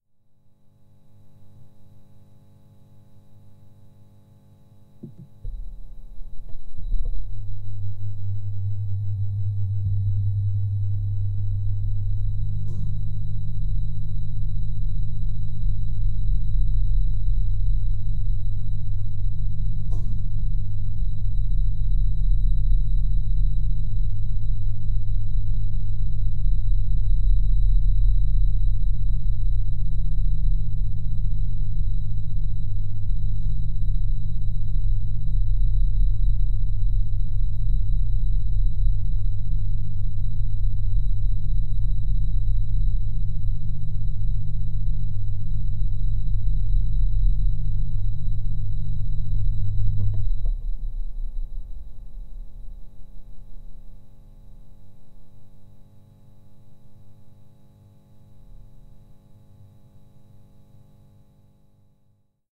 20151126 Fan On Off with Piezo 02
Recording turning the fan on and off with an acoustic-guitar piezo sensor between the fan and the floor.
industrial, fan